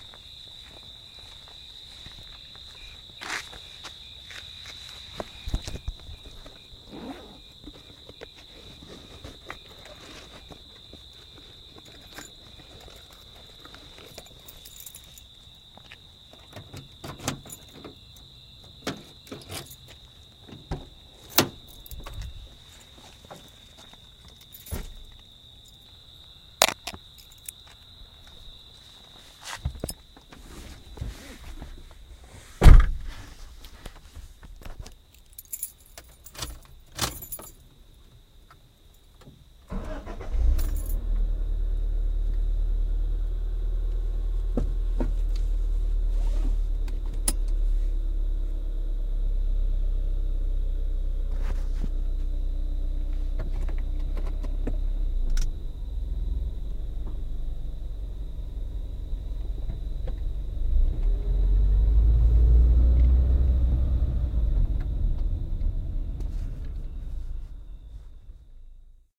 recording of getting into a car and driving away at night
driving, keys, engine, car, car-door, crickets, auto, night, slam, accelerate